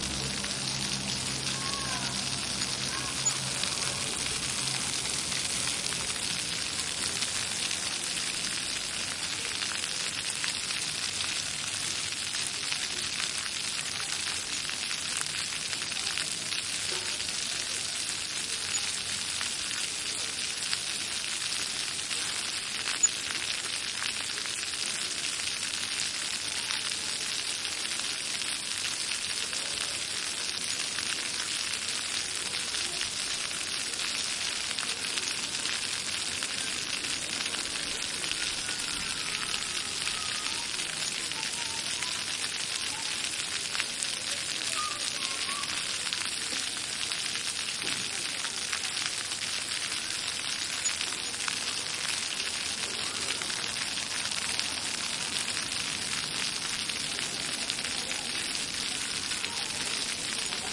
noise of water splashing onto floor. Olympus LS10 internal mics. Recorded at Alameda de Hercules, Seville, Spain